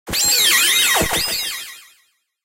Retro Game Sounds SFX 90
fx, gamesound, pickup, sfx, shoot, sound-design, sounddesign, soundeffect